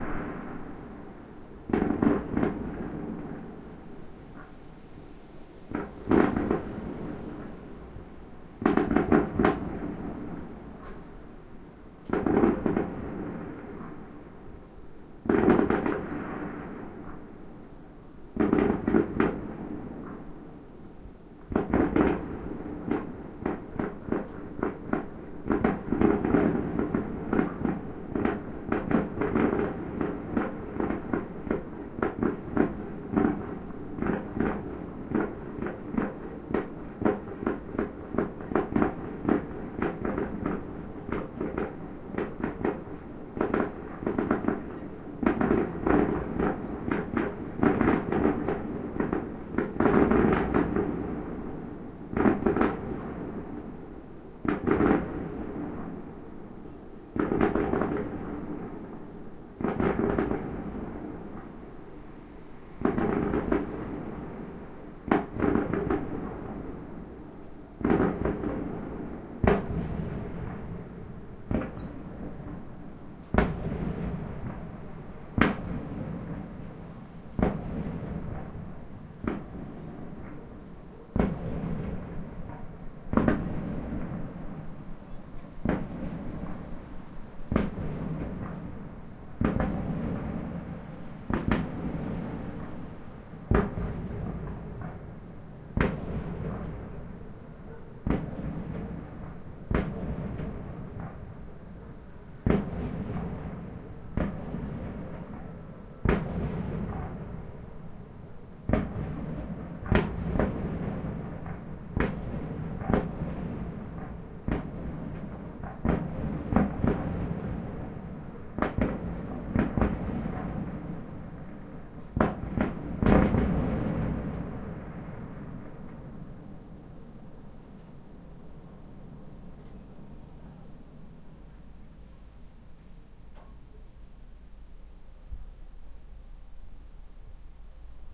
distant fireworks 2017-05-09
fireworks, h5, zoom